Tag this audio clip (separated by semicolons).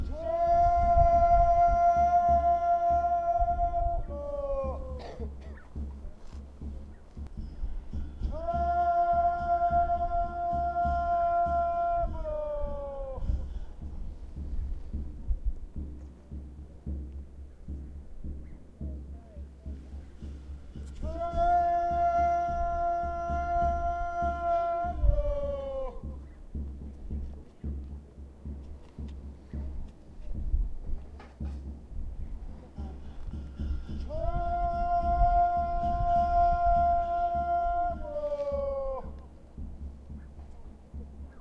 monk; tibetan; buddhist